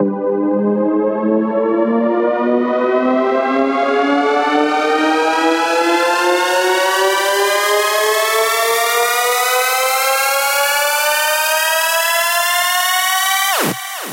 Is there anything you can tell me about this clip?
Saw Style Uplift / Rise (140 BPM / G Major)
This is a uplift or riser effect created with Massive and third pary effects and processors. This sound would ideal sound the build up section of a electric dance music track.
140-BPM, dance, EDM, effect, electric-dance-music, fx, G-Major, music, rise, riser, Saw, sound, sound-effect, Synth, tension, uplift